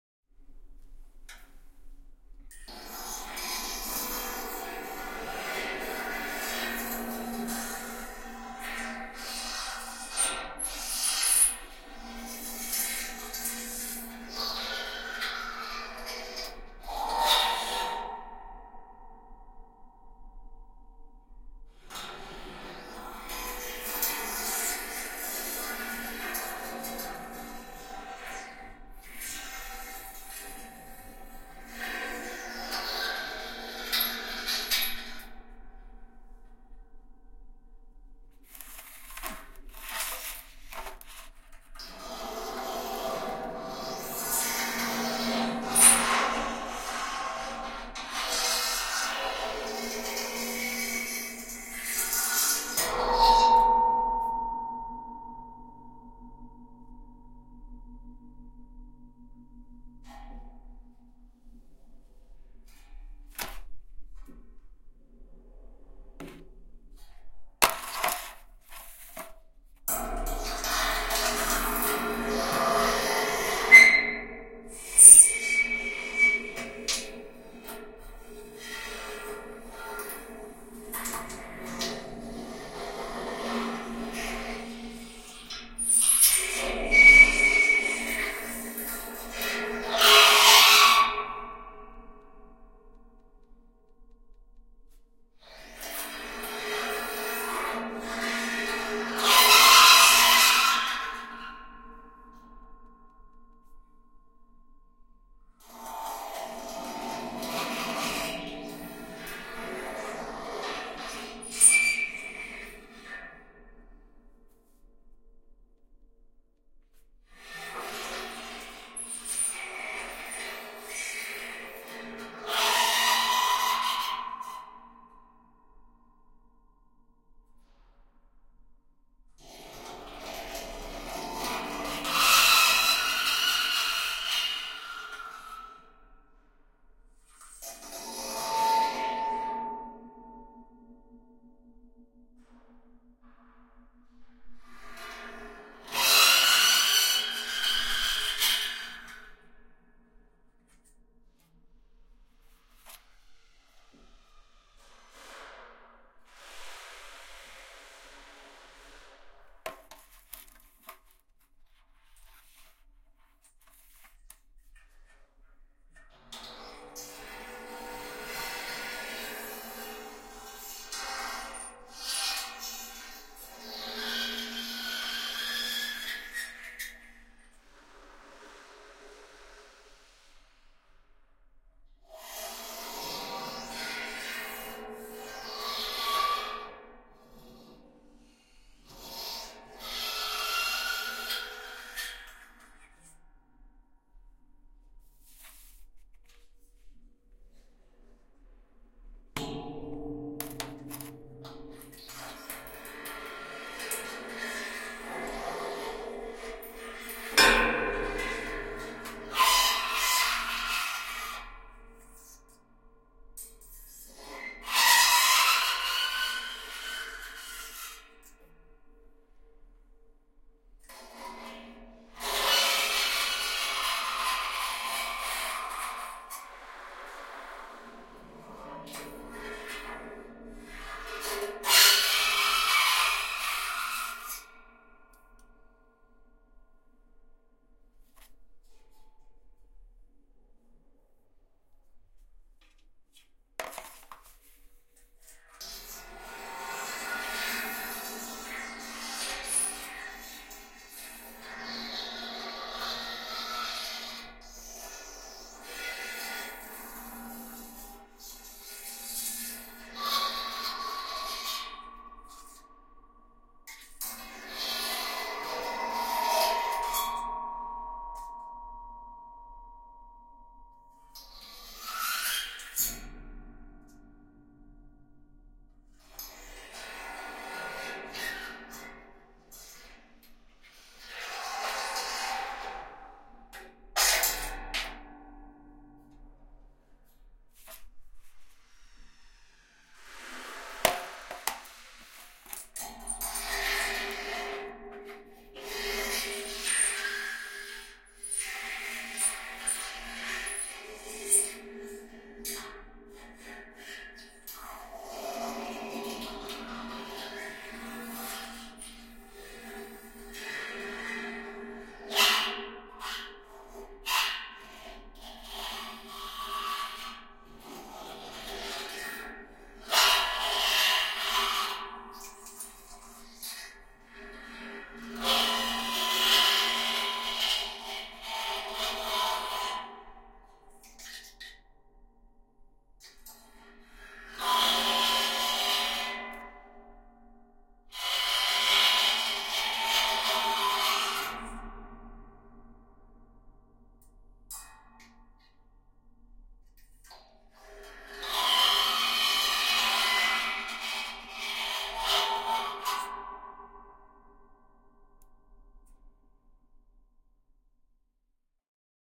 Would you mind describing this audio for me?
Metallic scraping in metal barrel